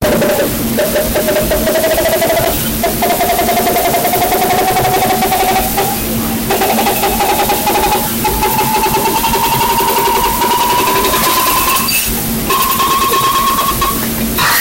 I made a great impersonation of the grudge when she is angry using adiomass and my own VOICE! I thought it was a good idea since i had figured out how to make that sound a LONG time ago (like 2 months or something) the scream you may hear at the end was me breathing out but because i added 250% more gain over and over again i ended up sounding like i met the "grudge". Good for horror movies and possibly a Grudge 2 (although i already saw the old grudge 2 this would be a remake.)
spEeEeEeEen